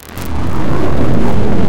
space ship noise